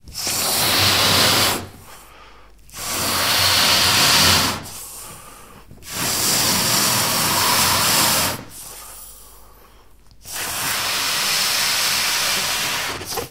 Blowing up balloons is hard work but it pays off with sounds.